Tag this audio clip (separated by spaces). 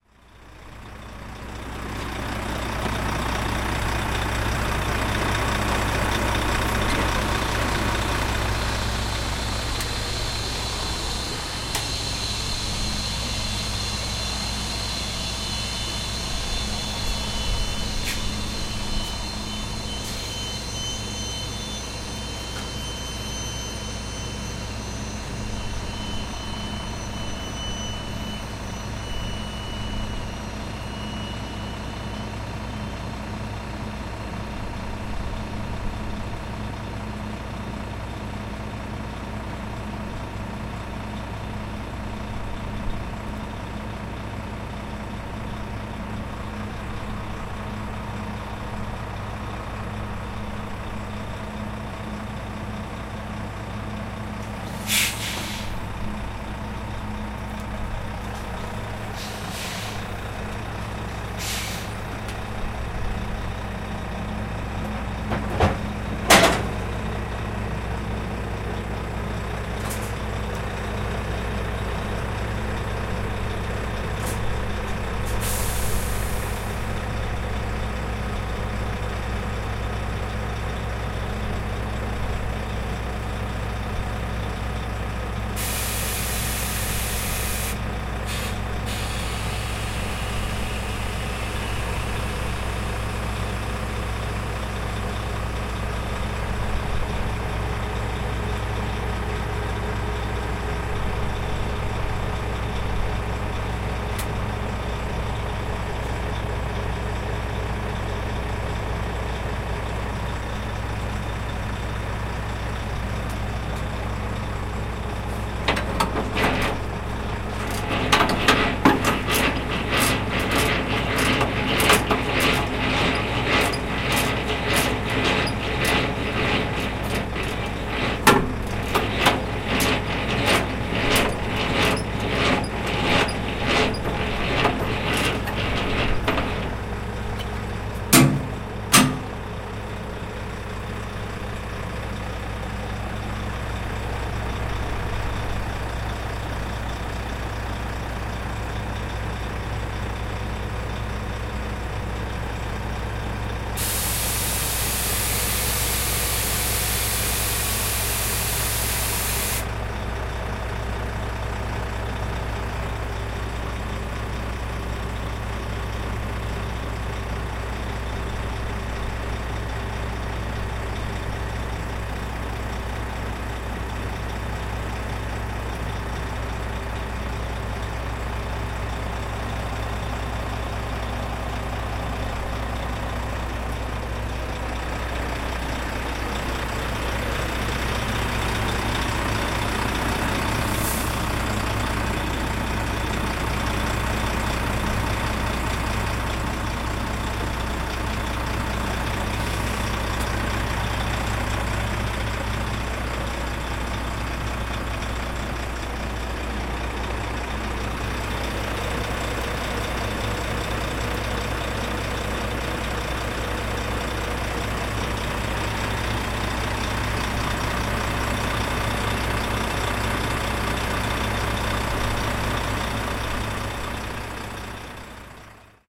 buff engine hiss hook-up hydro puff squeal truck whirr